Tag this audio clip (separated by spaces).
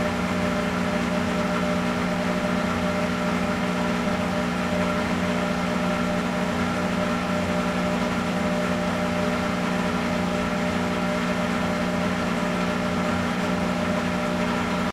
wash machine water industrial cycle washing